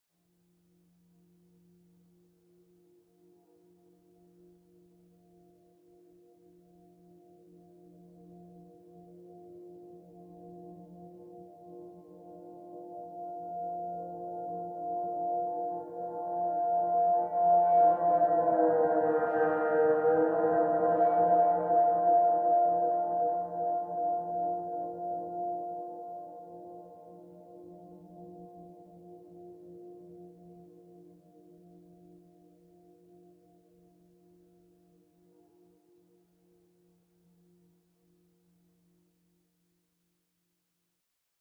Divine drone
Something I slowed down in a track
space, divine, drone, pad, dreamy, ambient, soundscape, string